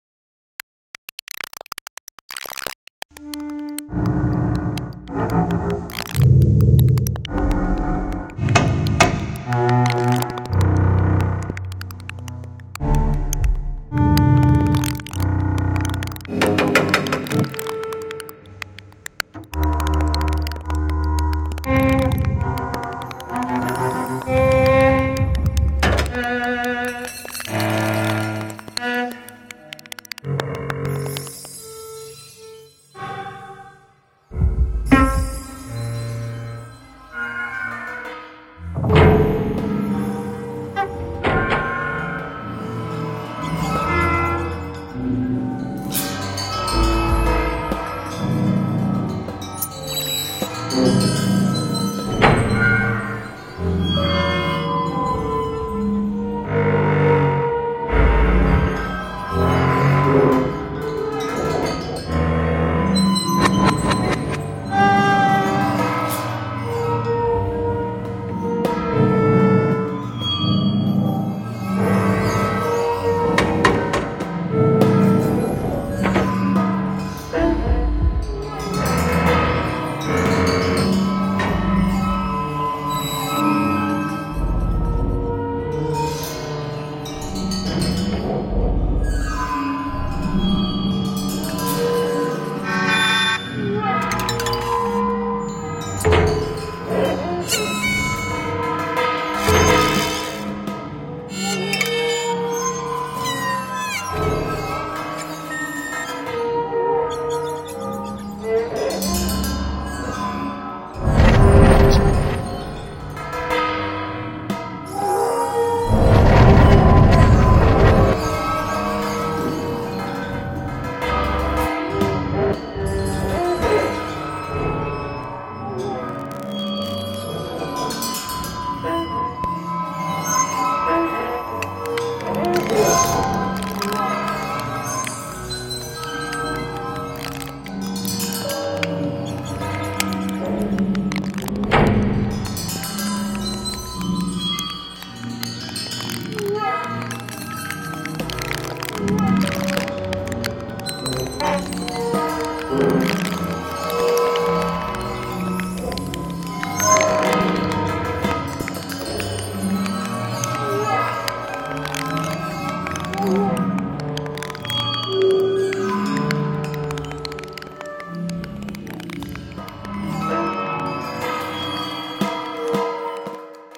Cellar Cello & Waterphones
Formatted for use in the Make Noise Morphagene. The most apt title perhaps. A suite for, well, cellos and waterphones. Creepy and unusual. Pairs well, with my 100% favorite Morphagene feature, its improvement with SLOWWWWW subtle pitch/timing shifting. A real creepy crawlly reel...
cello, mgreel, morphagene, timestretch, waterphone